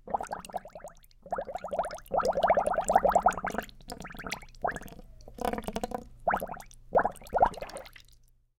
Bubbling water recorded with Neumann TLM103
boiling, bubbling, bucket, hoseneumann, squishy, studio, water